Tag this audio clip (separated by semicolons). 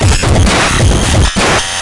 databrot; gameboy; loop; LSDJ; nintendo